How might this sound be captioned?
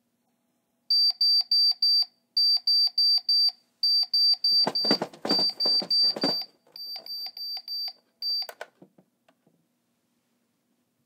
Alarm Clock SFX
Alarm clock sound effect that I used in a college project!